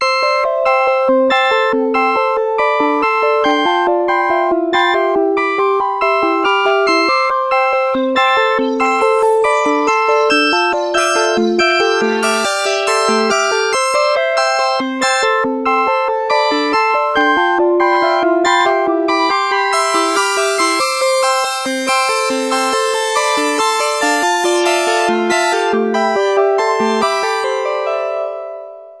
Nord keys 1 140
Nord Lead 2 - 2nd Dump
melody, glitch, soundscape, bleep, rythm, dirty, resonant, blip, backdrop, tonal, ambient, bass, idm, nord, background, electro